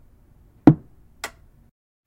Speaker Turn OFF
Turning off a speaker
off, speaker, turn